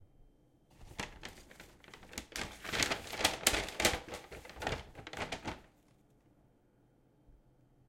11. Bag paper
Opening of a paper bag
bag, paper, wrapping